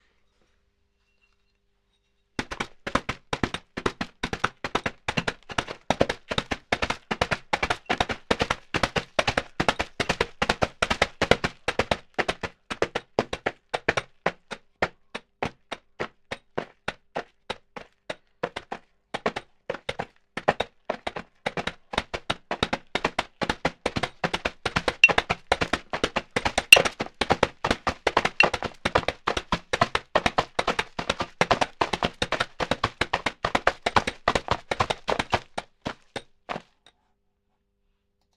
I recorded , this sound using the sand box , that was available to me in the SoundBooth on my campus. I created the horses hoof running sound, by stomping a wooden block into the sand box repetitively and I tried to mimic how a horse would run or move. I also played around with the pacing of the sound recording. So it can be used for a horse that is speeding up and slowing down.